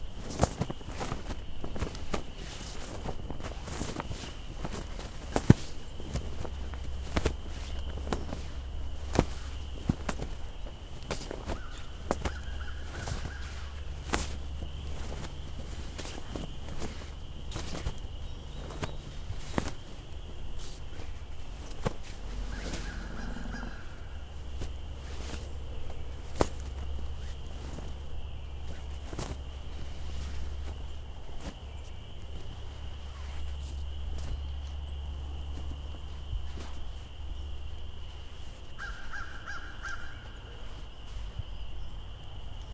Flag Flapping and Birds 2
Birds and nature ambience throughout recording.
bird, blow, cloth, flag, flap, movement, outdoor, wind